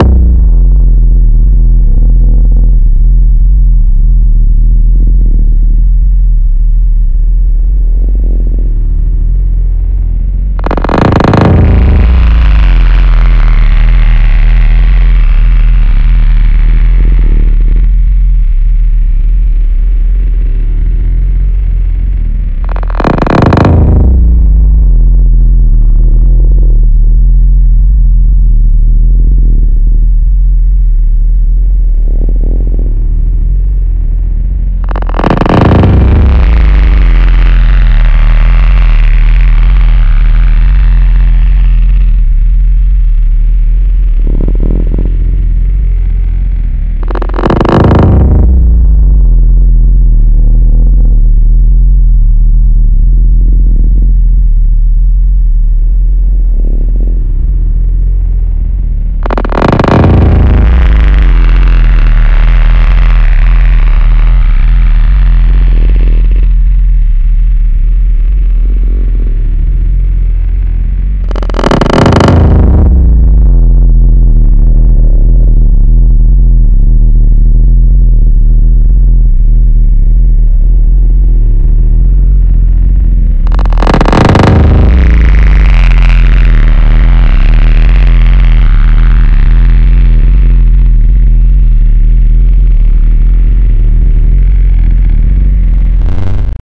WARING! this is a very loud sound, be careful with your speaker!
Additional processing on a simple beat: strongly pitched down, timestretched, filtered, compressed and distorted. I would want to create a 'groundbreaking' abstract sound that simulate the crashing of the land for a scene that regard the devastations of the earth. It's designed to be mixed with other sinister sound effects to produce a scary impact on the public.
This is part of a soundesign/scoring work for a show called "Ambienta": an original performance that will take place next summer in Italy. It's an ambitious project that mix together different artistic languages: body movement, visions, words.. and of course, music and sounds. Maybe useful for someone else.